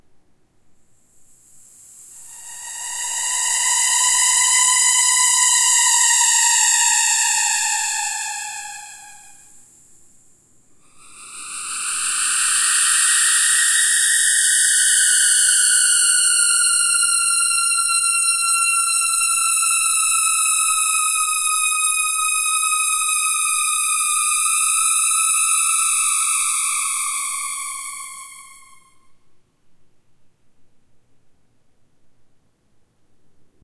creepy-toy ps01
A snippet from one of my squeak toy sounds, paulstretched in Audacity. Sounds like some of those creepy ambience effects heard in horror movies.
horror, tension